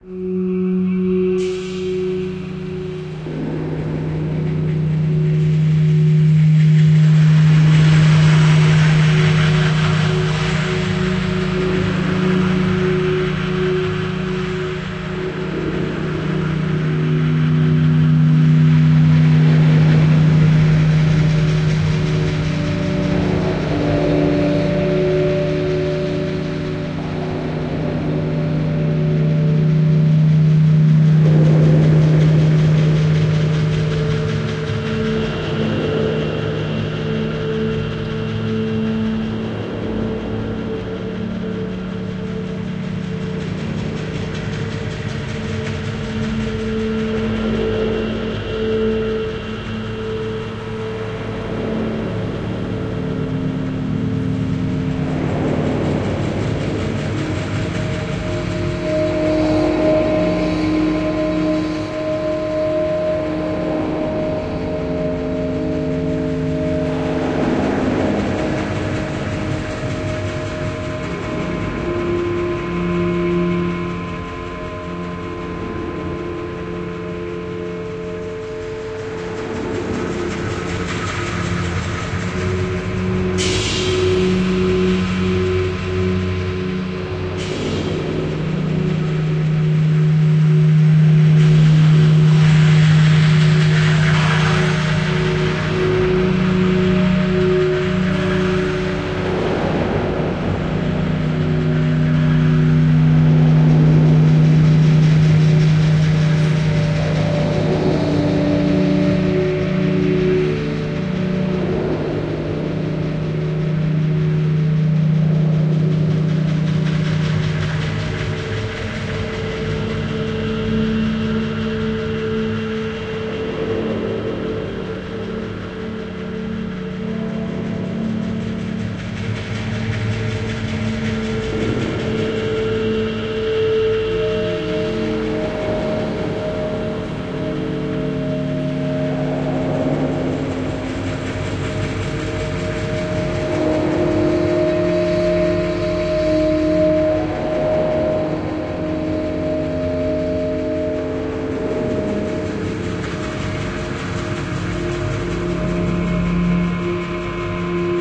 Dark Ghostly Mine Fatory Atmo Atmosphere